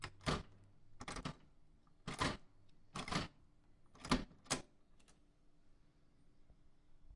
Secure Door Unlocking
The Sound of Unlocking a Secure Door with Keys.
Door, House, Keys, Secure, Unlock